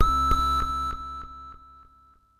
Q harsh bleep plus click delay at 100 bpm variation 2 - E4
This is a harsh bleep/synth sound with an added click with a delay on it at 100 bpm. The sound is on the key in the name of the file. It is part of the "Q multi 001: harsh bleep plus click delay at 100 bpm" sample pack which contains in total for variations with each 16 keys sampled of this sound. The variations were created using various filter en envelope settings on my Waldorf Q Rack. If you can crossfade samples in you favourite sampler, then these variations can be used for several velocity layers. Only normalization was applied after recording.